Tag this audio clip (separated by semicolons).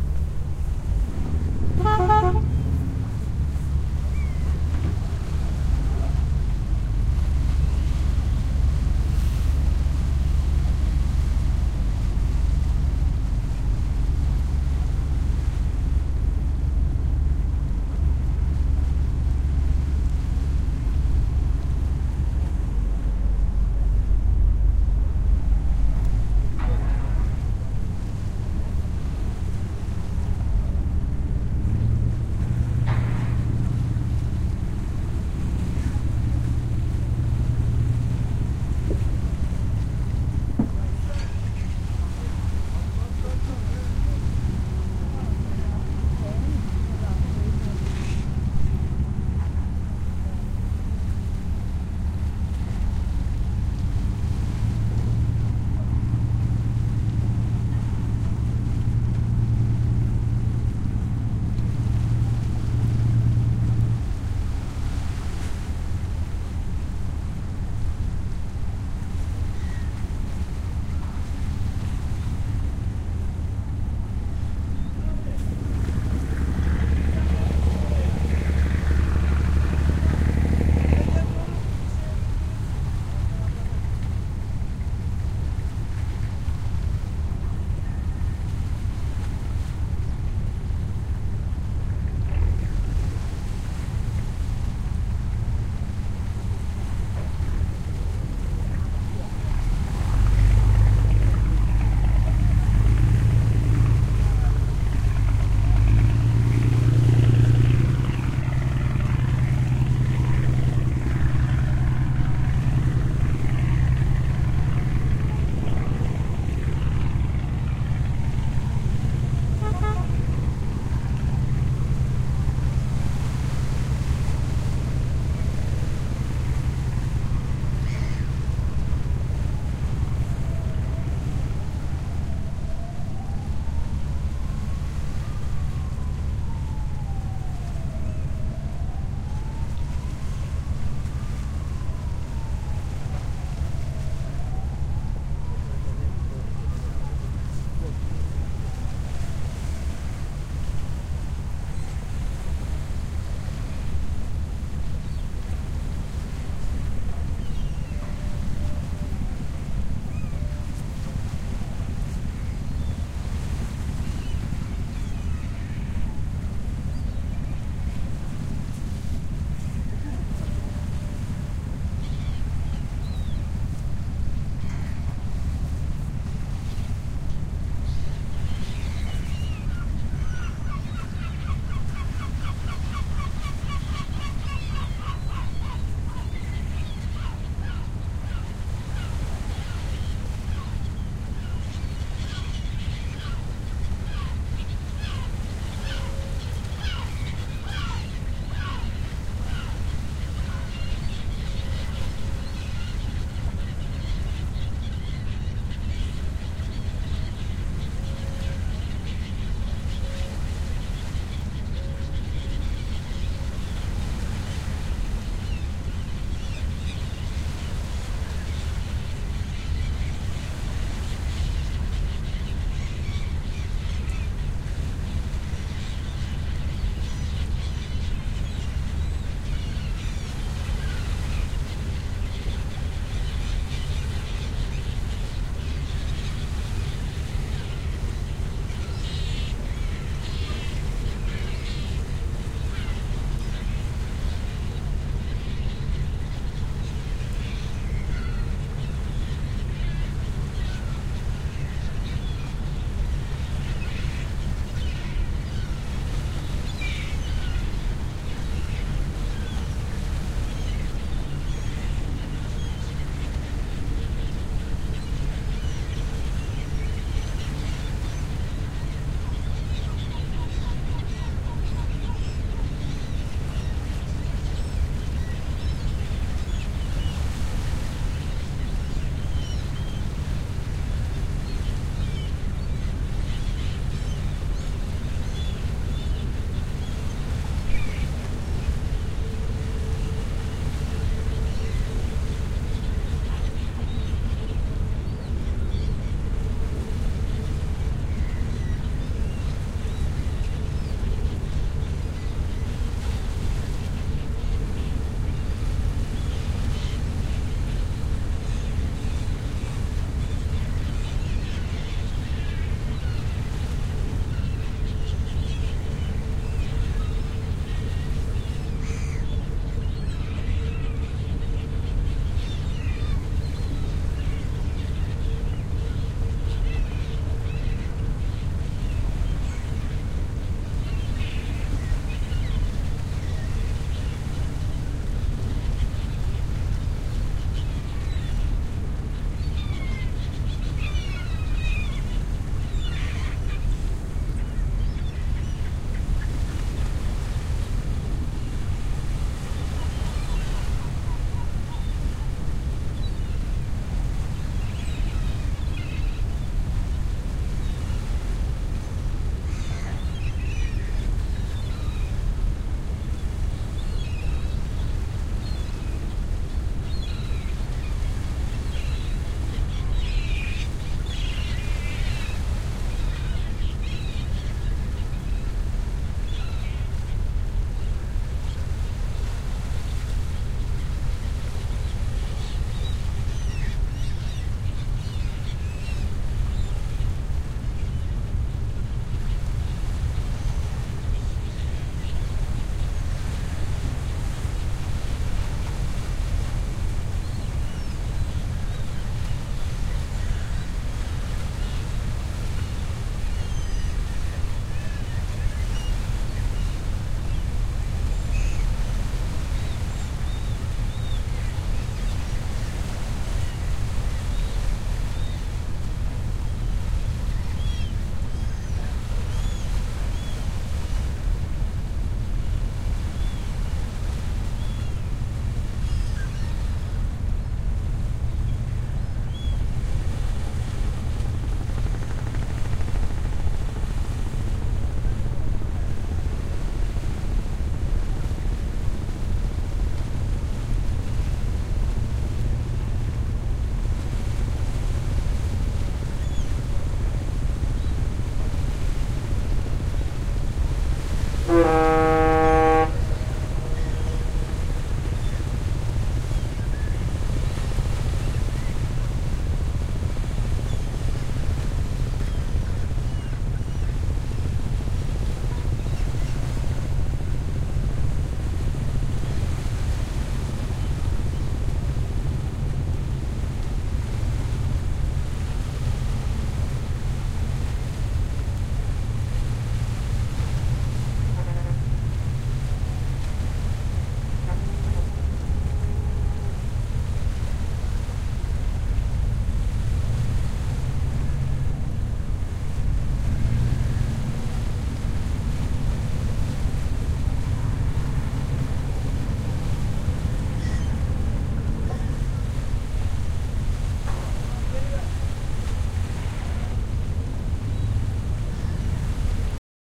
maidens-tower; ship; horn; istanbul; turkey; bosphorus; geo-ip